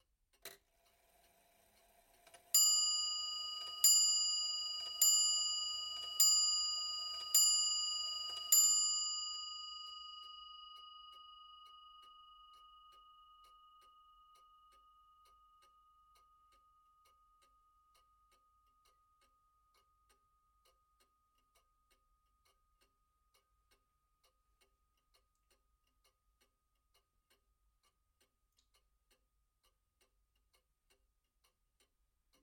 A regency clock (made by R Restall, Croydon) chimes 6 times. High pitched.